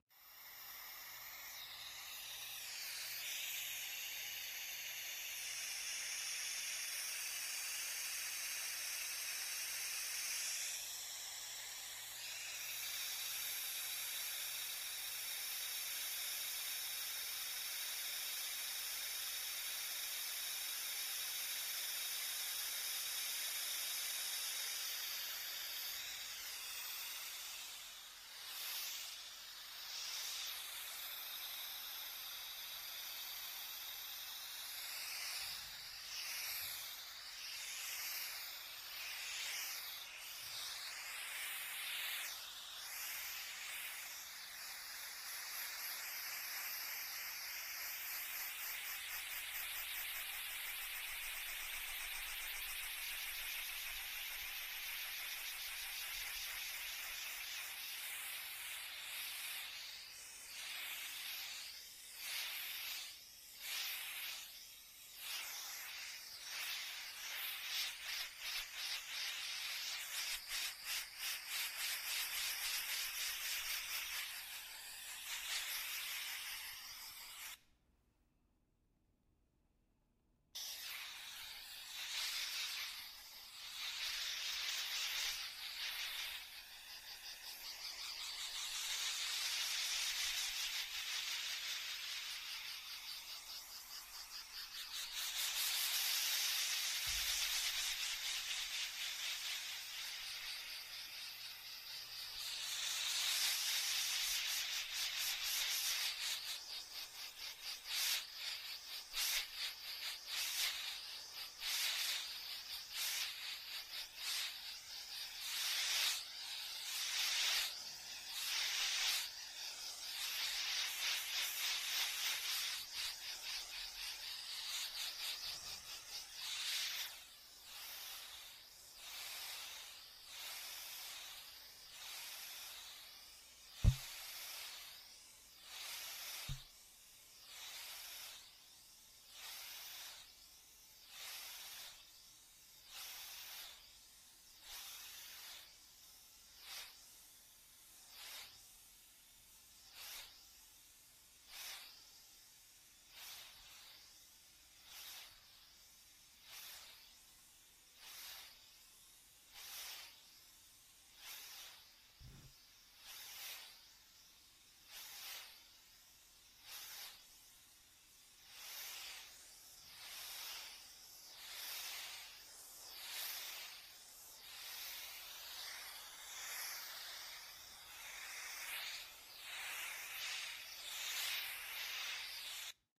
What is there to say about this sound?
maryam sounds 9
Truly displays the orientation of sound recorded from the mic I am using. All errors in audio leveling are purely intentional.
abstract, glitch, multimedia, new-age